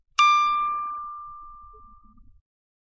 A vintage carillon sample played with a manual lever.
sad, vibration